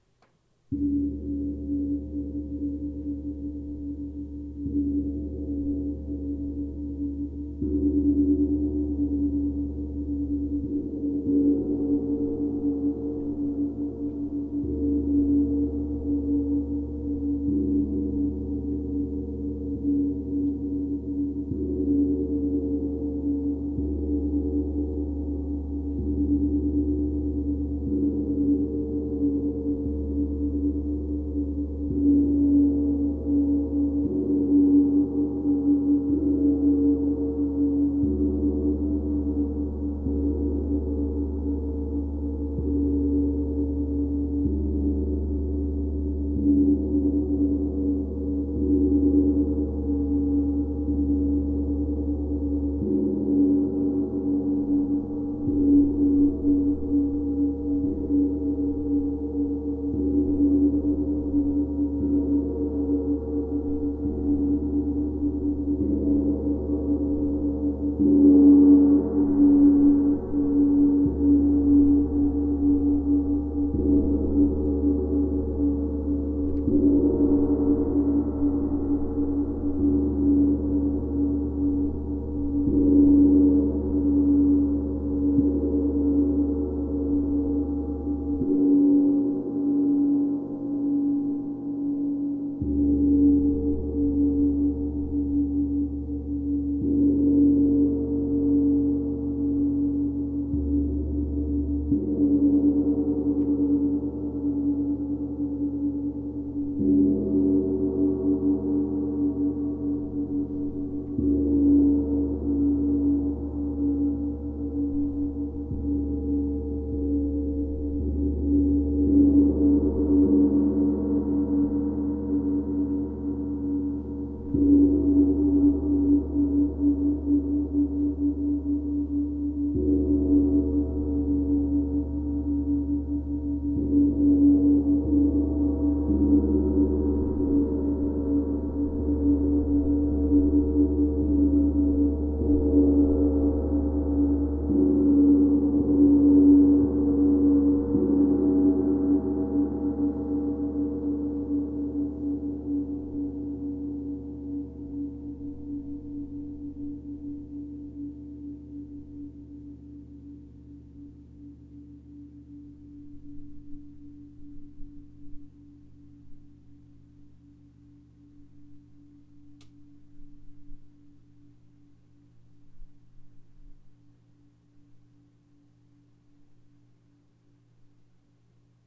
Medium level resonance from rhythmic slow beats at 30-inch diameter Ziljian gong, struck with hard 3 1/2" diameter yarn-wrapped Ziljian mallet. Recorded with Zoom H4N located 8-feet directly in front of gong, 2-feet off floor.